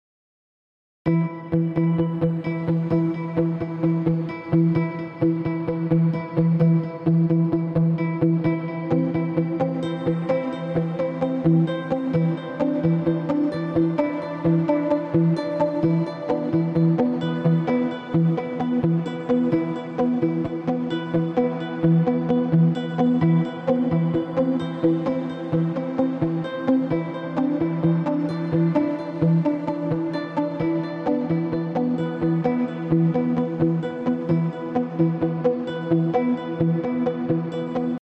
an arpeggios sample looped
made in fl studio